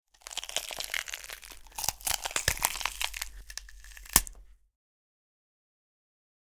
Bone crushneck twist
Bone crush sound made of crushing a green pepper in a Neumann mic into a Mac.
bone, break, crush, neck, twist